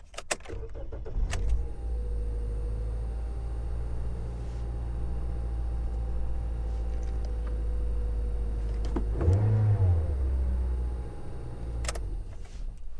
Car Toyota interior ignition key engine rev 1 mono